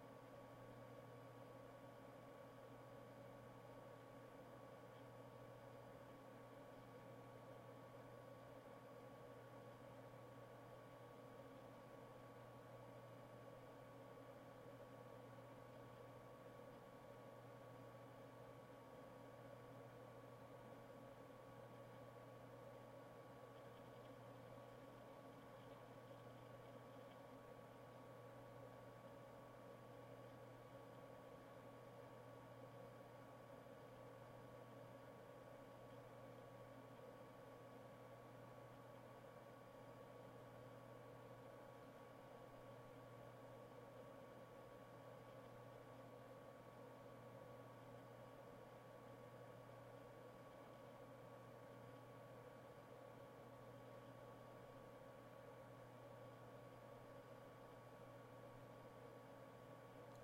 This is the simplest sound there is: just my Logitech USB mic turned around and pointed directly back at the computer, nothing else happening in the room, no editing, no effects, just the raw sound of my Sony Vaio's cooling fan. Be advised that it is a raw sound and I think it may have caught the sound of me clicking the mouse to end the recording at the end.